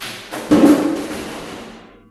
Bonks, bashes and scrapes recorded in a hospital at night.